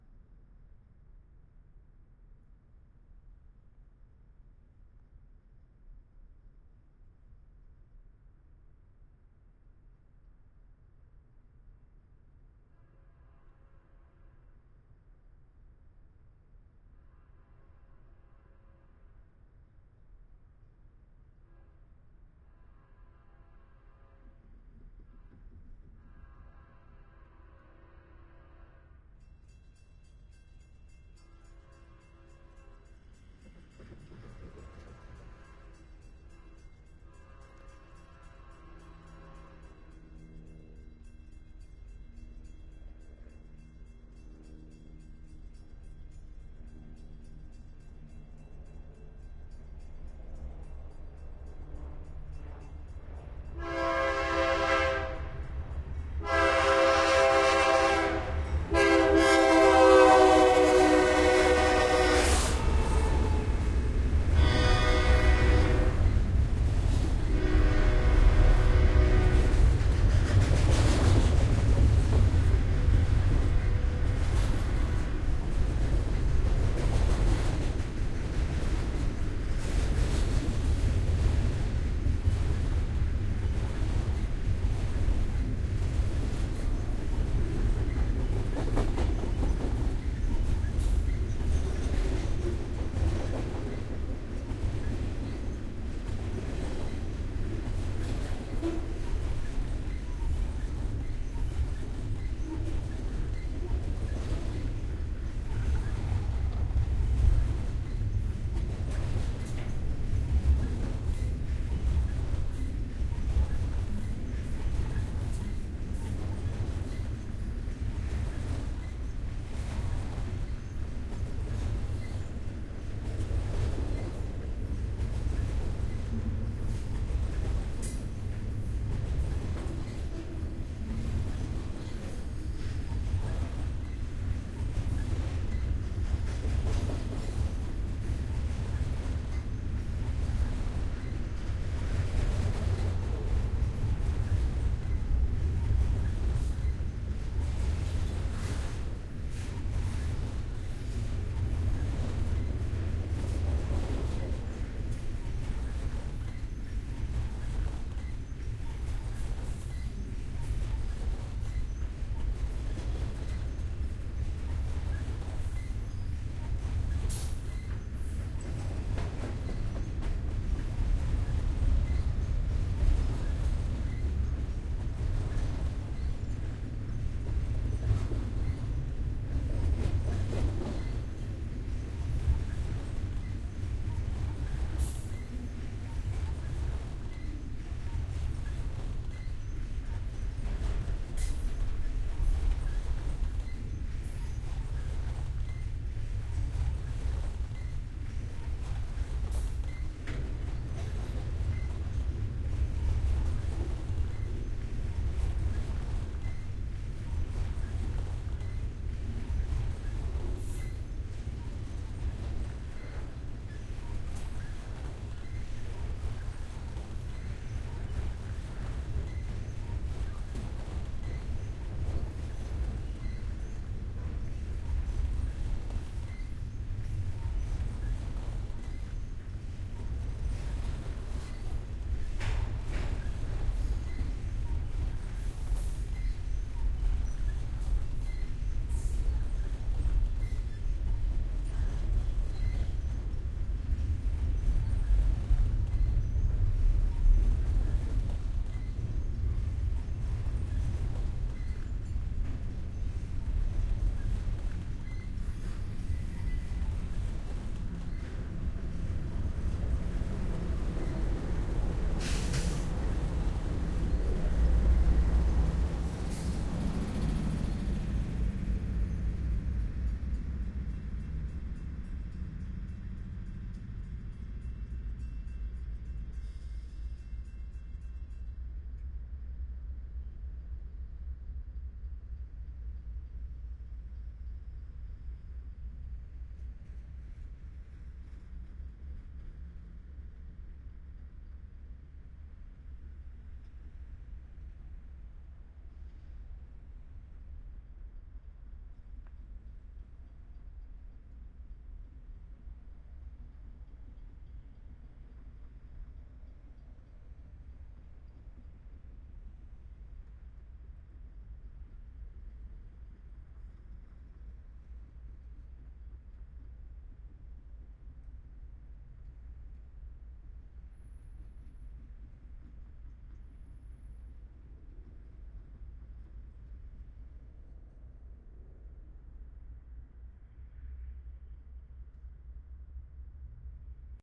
sample pack.
The three samples in this series were recorded simultaneously (from
approximately the same position) with three different standard stereo
microphone arrangements: mid-side (mixed into L-R), X-Y cardioid, and
with a Jecklin disk.
The 5'34" recordings capture a long freight train (with a helicopter
flying overhead) passing approximately 10 feet in front of the
microphones (from left to right) in Berkeley, California (USA) on
September 17, 2006.
This recording was made with a pair of Audio-Technica AT-3032
omni-directional microphones (with Rycote "ball gag" windscreens)
mounted on a Jecklin disk and connected to a Sound Devices 744T
airhorn, audio-technica, diesel, field-recording, freight, helicopter, horn, jecklin, locomotive, railroad, train